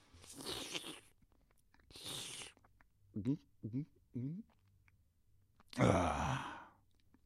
14 drink geluiden
Drinking sounds with sipping.